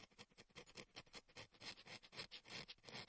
Queneau grat 0
regle qui gratte sur surface
Grattements, piezo, r